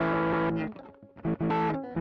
Randomly played, spliced and quantized guitar track.